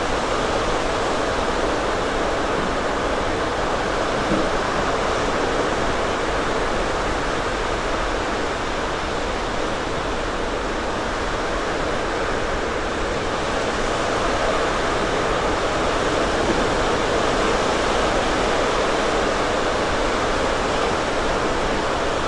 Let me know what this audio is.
Peaceful sounds of the ocean at night, lopping short audio file. Recorded with the Zoom H5 portable recorder and stock stereo X-Y mic attachment. Some loudness and EQ processing in SoundForge and Cubase.